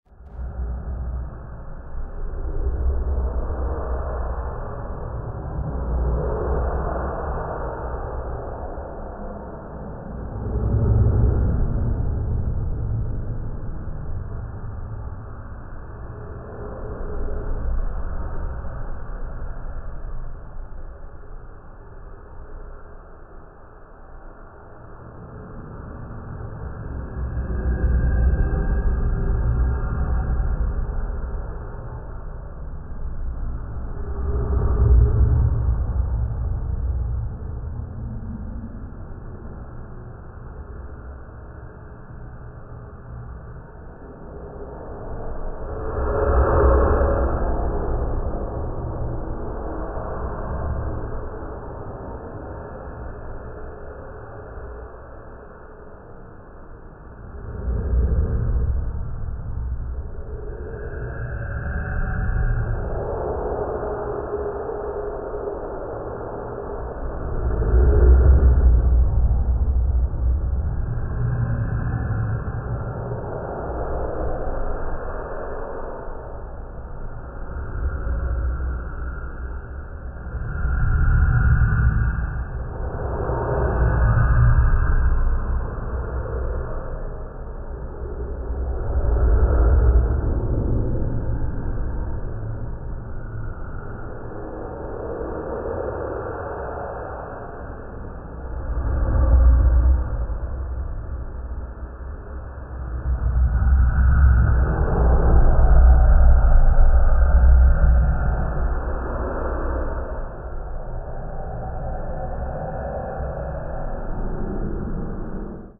soundscape made from slowed down audio. perfect as sound bed for spooky scenes. extra eq might be recommendable because right now it has quite some low end.
creepy, haunted-house, horror, ghost, halloween, soundscape, spooky, scary